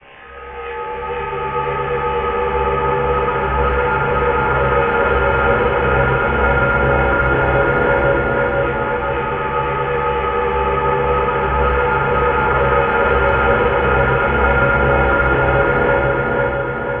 A dark haunting style pad
ambient, dark, haunting, horror